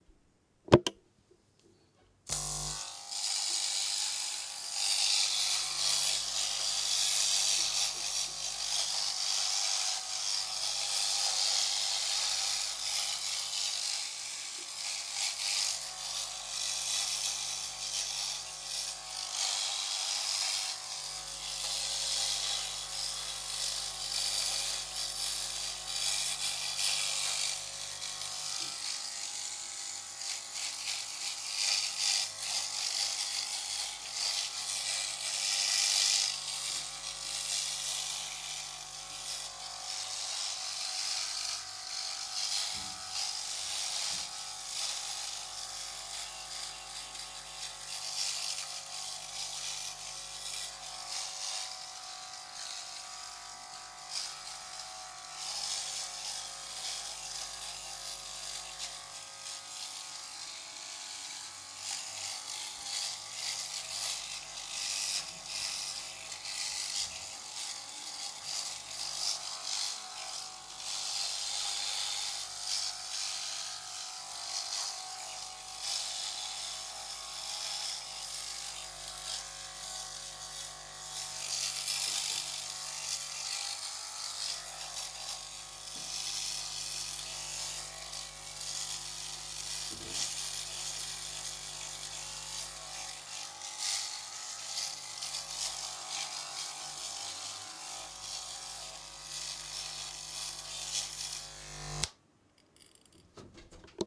Electic Shaving
Recording: Tascam DR-1